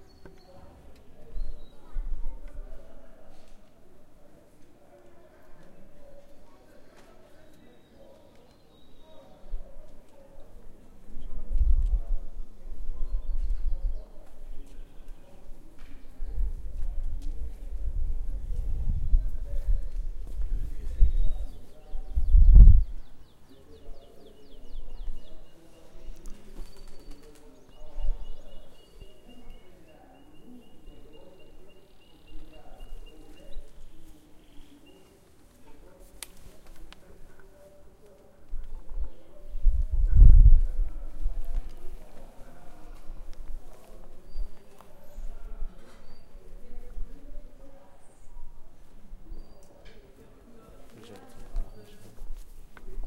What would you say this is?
Lisbon's street 1
was walking down the Lisbon's street with recorded Zoom.
ambient, city, street